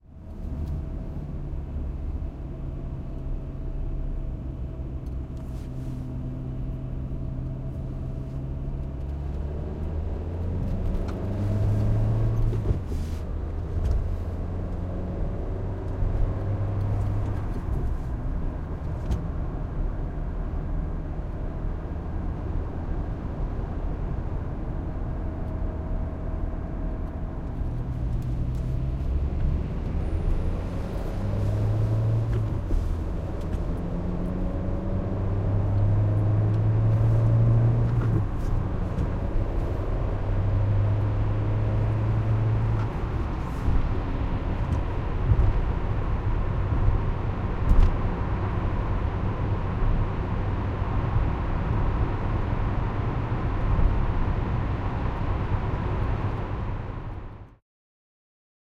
peugot 206 car interior changing gears inside tunnel
Recorded with a Sony PCM-D50 from the inside of a peugot 206 on a dry sunny day.
Driving through the Piet-hein tunnel in Amsterdam.
Changing gears and stuff.
changing, car, interior, 206, tunnel, inside, peugot, gears